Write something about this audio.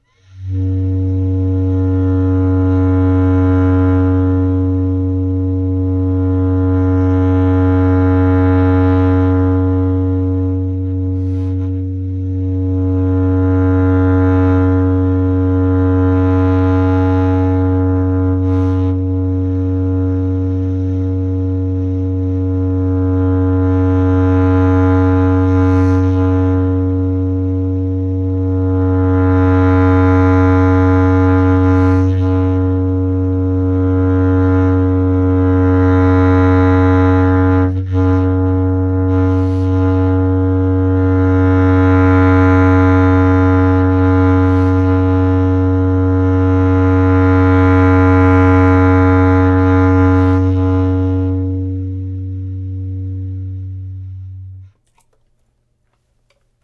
A long sustained tone made by a bass clarinet. The player uses circular breathing.